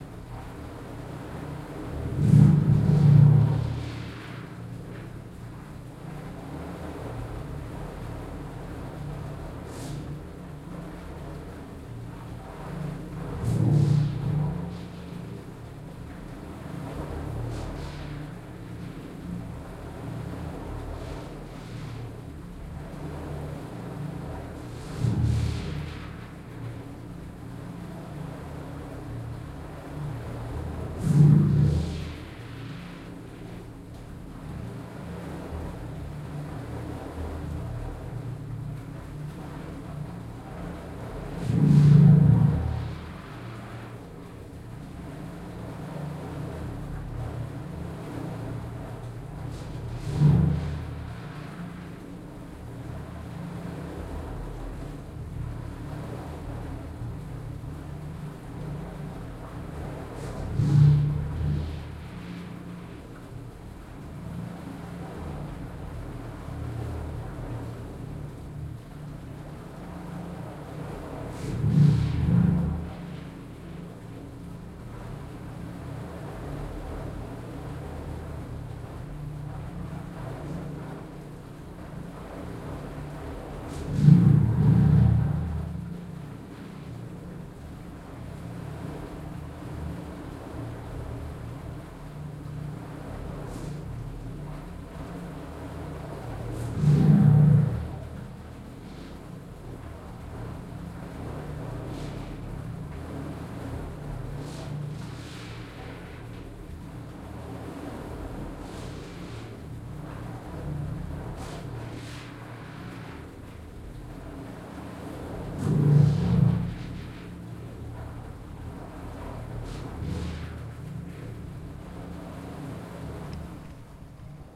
I recorded the weird sound coming from a street underground box/pipe pumping water nearby my place. Not sure how to explain it in english.